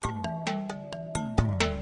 Vivace, con screamo - No Solo
This is a remake of Bartok's "County Fair". It was originally input as MIDI into Digital Performer. Many of the original notes are changed with patches and some editing. Bartok's original rendition was with a single piano. Honestly after redoing it I thought he would turn over in his grave screaming if he heard my version. So, I gave it the name "Vivace, con screamo". Enjoy!